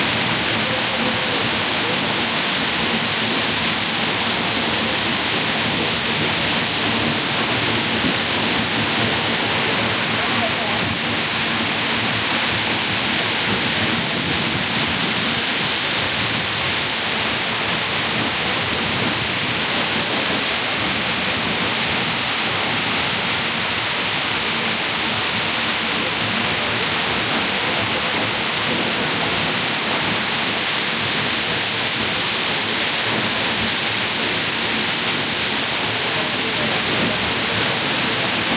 20090828.skogar.waterfall.
Rumble of water from the Skogar waterfall, Iceland. Recorded using a Sony Powershot S3 camera
field-recording, splashing